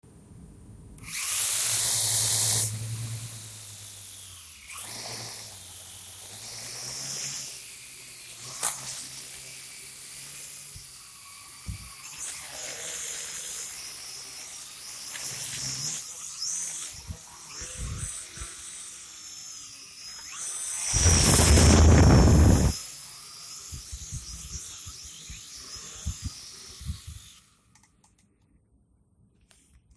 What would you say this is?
Recorded sound of drone for a performance
drone, flying, professionally